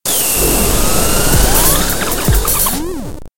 Messy sounding radio imaging element created in Audacity, Adobe Audition and LapChirp. Beat was played on Yamaha e-drum kit.